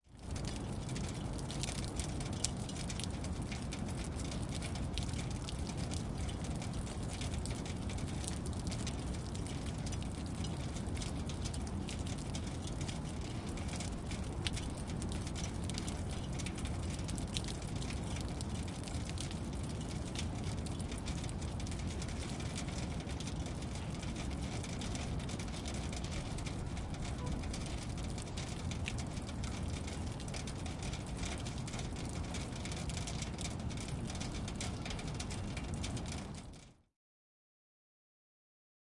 17 hn dripsHeinzField
Water dripping from melting snow at Heinz Field, Pittsburgh
heinz-field, melting, water, snow, drips